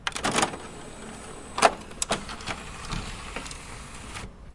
Cd tray
cd, opening, tray